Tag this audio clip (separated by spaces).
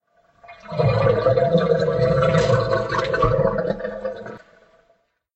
Monster Growl